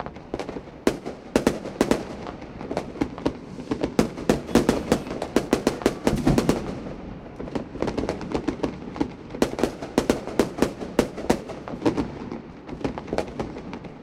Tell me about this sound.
delphis FIREWORKS LOOP 19 MO
Fireworks recording at Delphi's home. Inside the house by open window under the balcony Recording with AKG C3000B into Steinberg Cubase 4.1 (mono) using the vst3 plugins Gate, Compressor and Limiter. Loop made with Steinberg WaveLab 6.1 no special plugins where used.
ambient, explosion, c3000b, delphis, shot, fireworks, c4, thunder, fire, akg